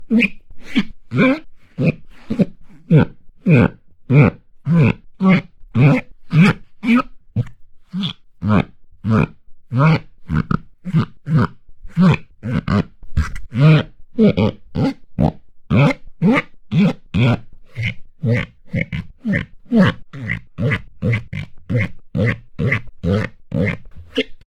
Rubber Squeak Type 02 192 Mono
Using a wet rubber sandal to produce a range of different rubber squeaks. Intended for foley but possibly useful for more abstract sound design & creatures.
rubber, formant, squeak, squeaky